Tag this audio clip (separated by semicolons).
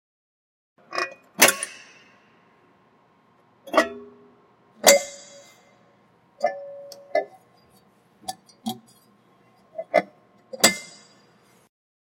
plug; Charge; car; electric; cover